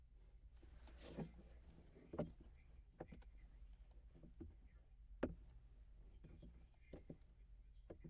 Footsteps on Wood
Someone turning around and walking on a wood floor.
wood, footsteps